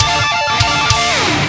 Victory (Rock Guitar Tapping)
A short and simple tapping riff. All guitar tracks were recorded with an Ibanez RG7321 plugged directly into an M-Audio Fast Track Pro. I used Cubase as my DAW and plugins by TSE Audio and Lepou for overdrive and amp simulation, respectively, some stock Cubase plugins (EQ, compression, Delay, etc.) and some drum hits were added using Superior Drummer.
Distorted, Guitar, Metal, Riff, Rock, Tapping